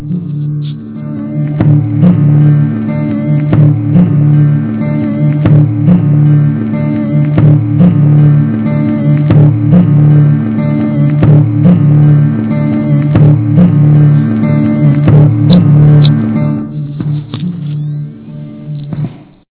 this is the wavy looping drone sound of a skipping record on a broken turntable.